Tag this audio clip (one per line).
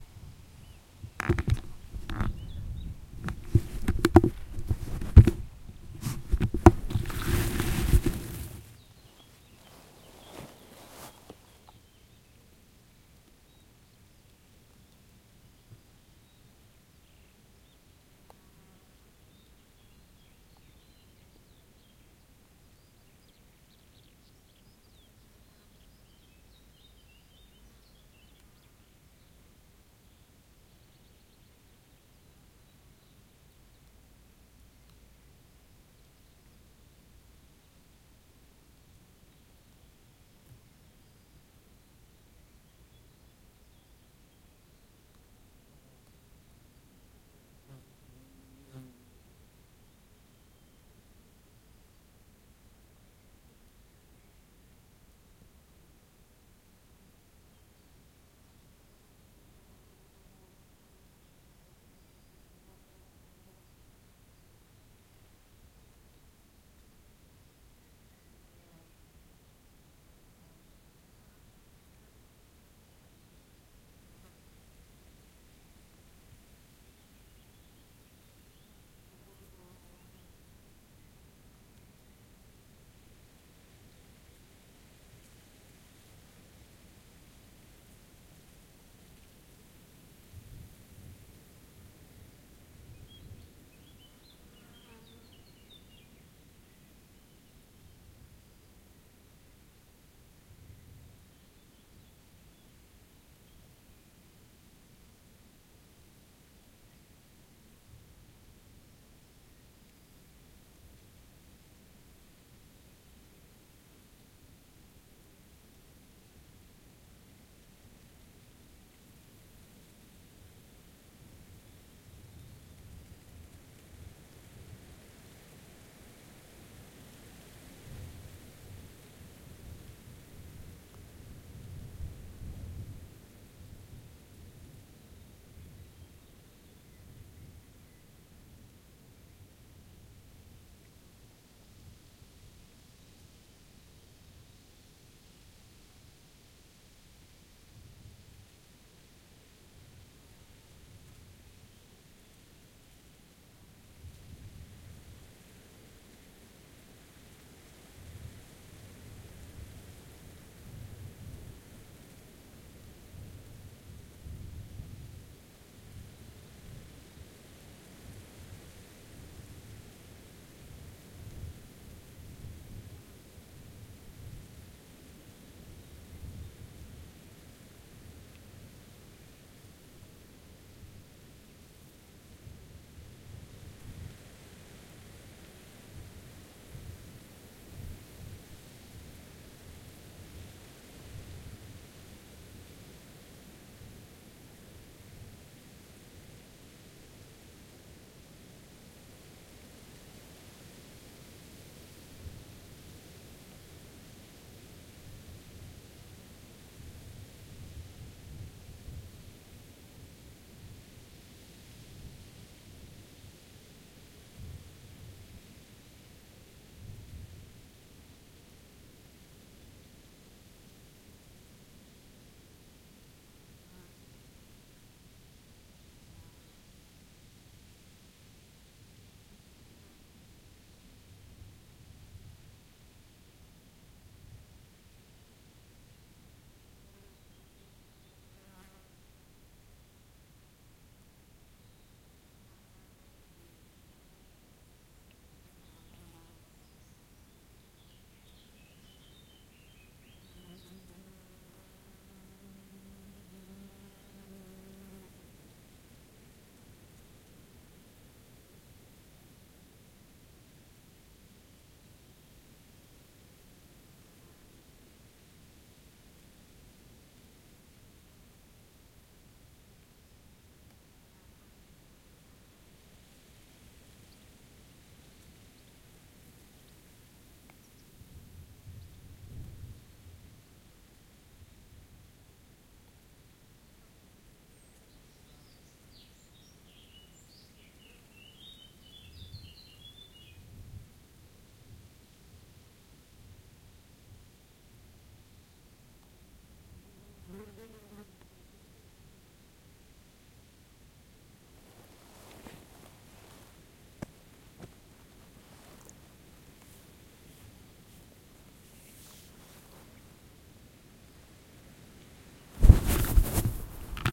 birds
field-recording
forest
meadow
middle
nature
spring
wind